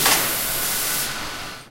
Designa Factory Sounds0023
field-recording factory machines
factory, machines, field-recording